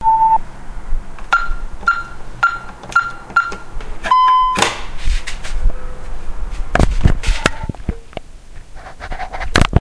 Security alarm sound
home, security, alarm